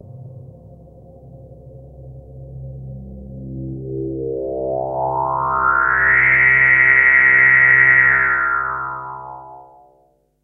Synth Swell
a swelling synth buildup
Buildup, Swelling, Synth